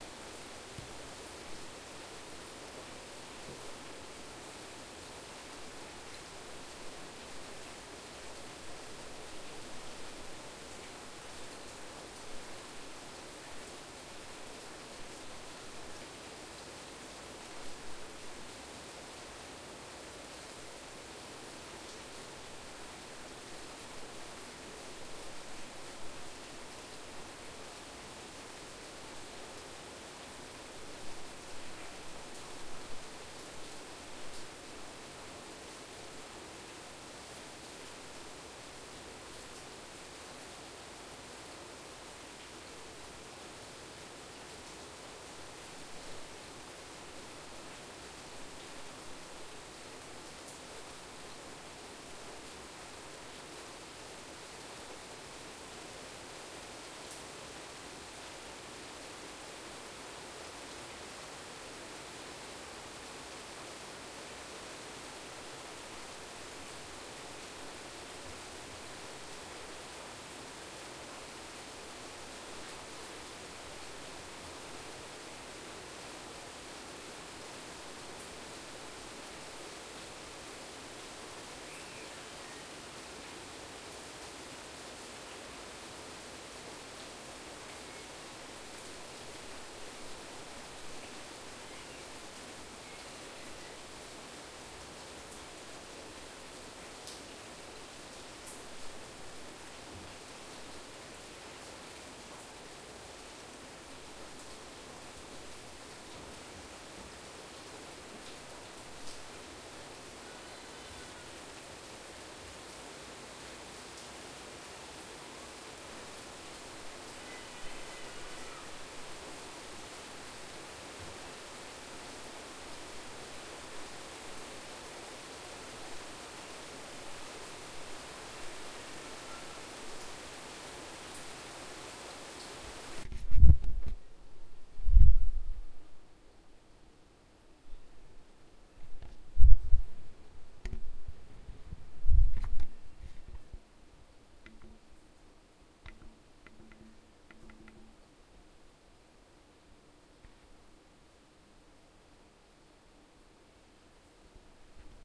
Recorded a rainy day outside my house with Tascam DR-40
Tokyo, Rain, field-recording